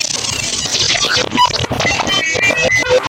Metallic and Fuzzy
an odd metallic & random blip sound.
weird, processed, metallic, experimental